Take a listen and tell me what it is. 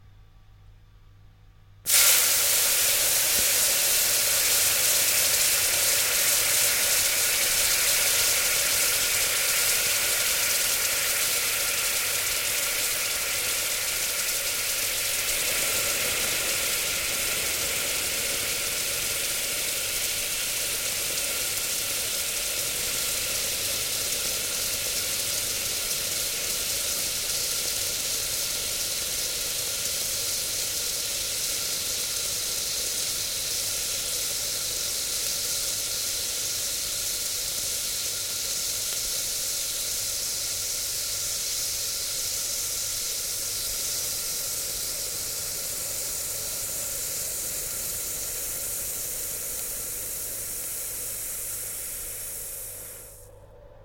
Water evaporating once dropped onto a hot pan - take 5.
ice, water, kitchen, hiss, evaporate, steam, vapour